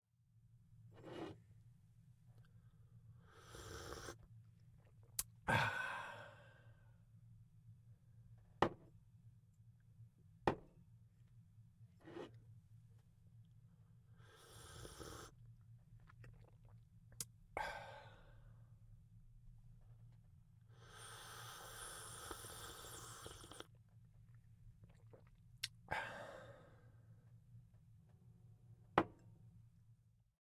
I'm just slurping som coffee like someone who really likes coffee.